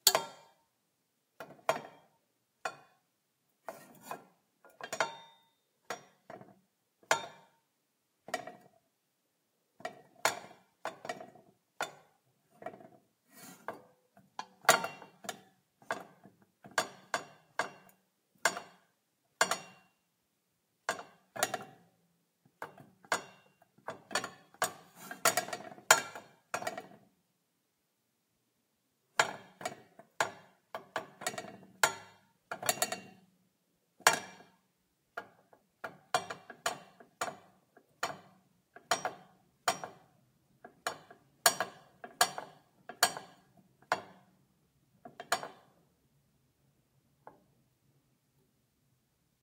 Metal pot, put down on stove top
putting a metal pot down on a stove top
metal, top, stove, kitchen, put, pan, cooking